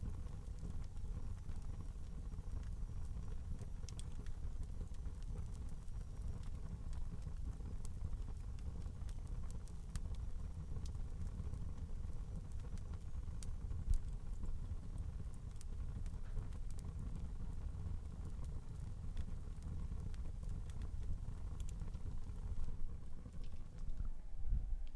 yes another fire sound